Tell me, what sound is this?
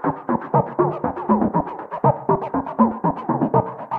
Wierd Loop 006d 120bpm
Loops created by cut / copy / splice sections from sounds on the pack Ableton Live 22-Feb-2014.
These are strange loops at 120 bpm. Hopefully someone will find them useful.
rhythmic, 120bpm, loopable, loop, synthesized, strange, echo, seamless-loop, delay, synthetic